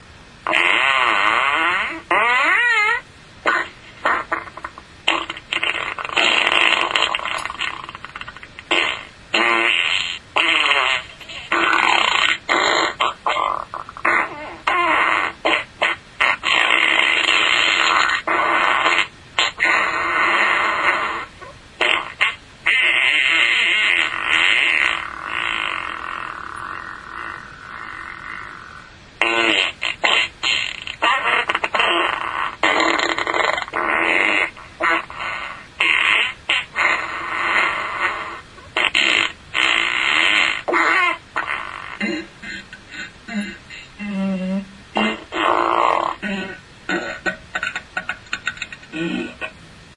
fart montage 3
fart, gas